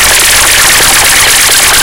FLoWerS 130bpm Oddity Loop 012
Kindof a feel-good house loop...
house, electro, resonance, experimental, loop, techno